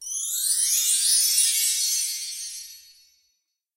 chimes 3+sec gliss up
Rising glissando on LP double-row chime tree. Recorded in my closet on Yamaha AW16-G using a cheap Shure mic.
chimes, glissando, orchestral, percussion, wind-chimes, windchimes